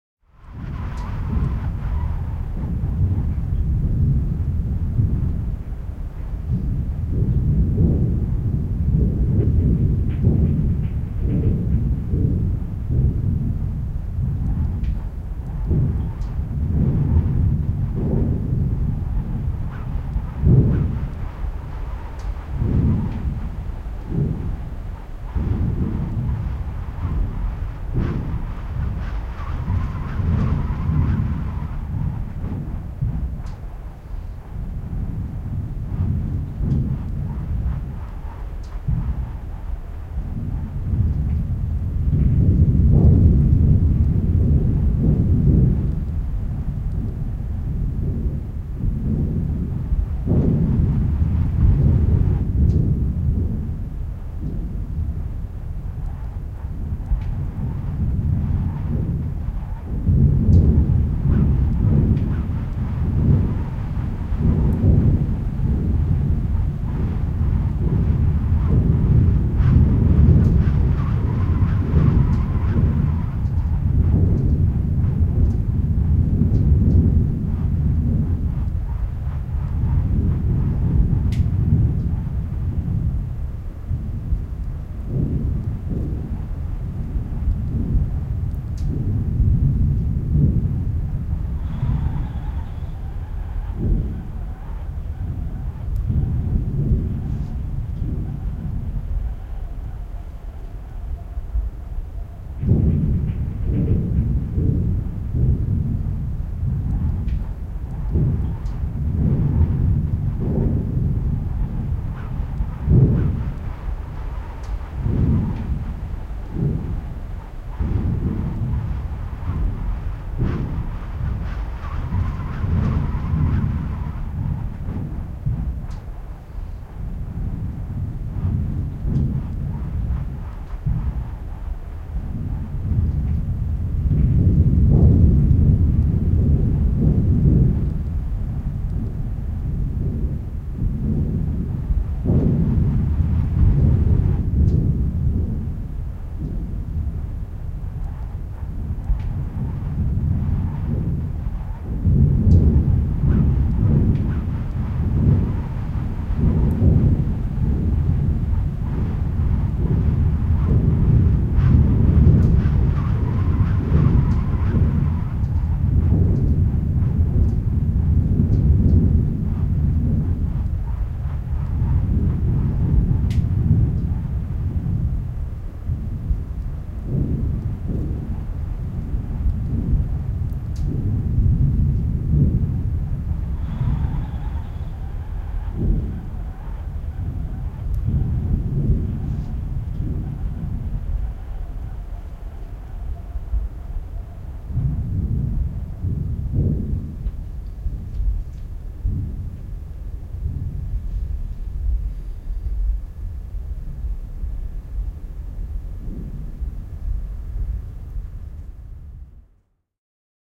Peltikatto kumisee tuulessa, tuuli vinkuu / Tin roof, hollow booming, rumbling in the whistling wind, interior
Pelti kumisee kumeasti vinkuvassa ja puuskittaisessa tuulessa. Sisä.
Paikka/Place: Suomi / Finland / Nummela
Aika/Date: 14.02.2004